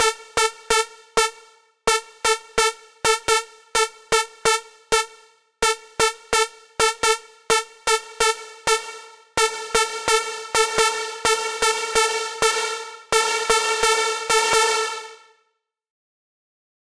Lead Synth A
Dirty Dutch lead synth sound, 128 BPM
Dutch, afrojack, dirty, electro, house, lead, synth